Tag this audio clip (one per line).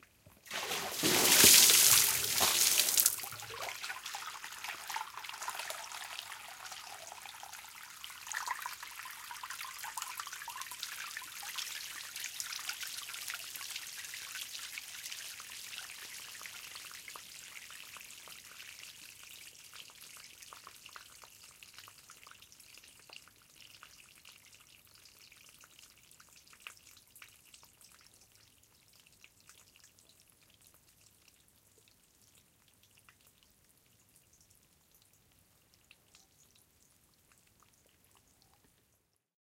staircase
provence
trickle
night
splash
water
stone
outdoor